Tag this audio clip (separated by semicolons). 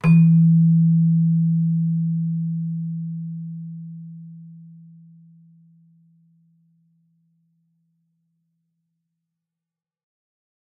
chimes; bell; keyboard; celesta